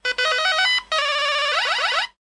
retro game jingle
Retro chirpy video game jingle